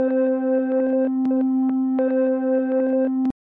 Some Rhodes into a looper and a granular plugin I made. Trimmed to make a nice loop.